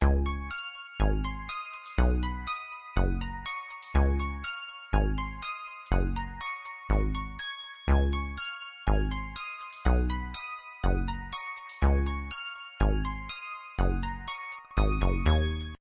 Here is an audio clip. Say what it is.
An uplifting musical loop.
bass, cute, digital, electronic, happy, loop, melodic, music, musical, plucky, soundtrack, synth, synthesized, synthesizer